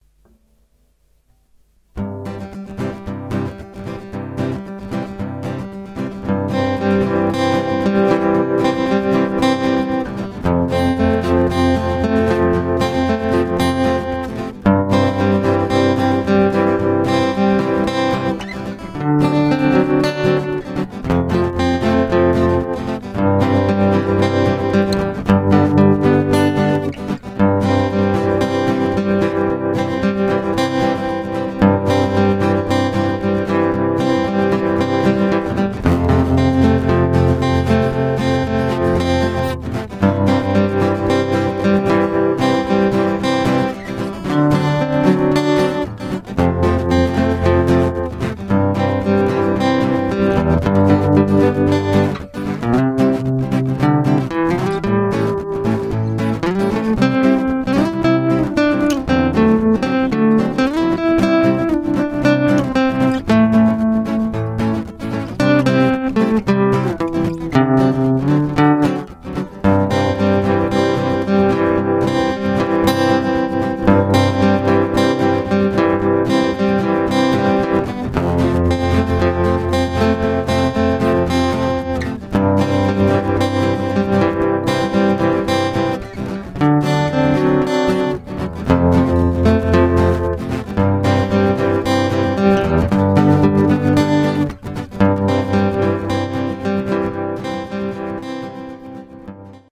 This is esey coutry music